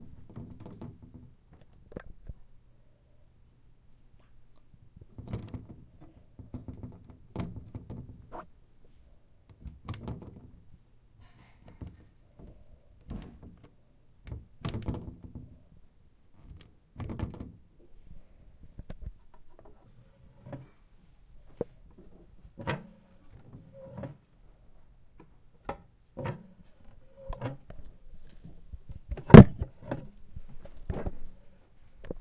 Rattling a glass-paned cabinet door and opening the lid to a writing desk.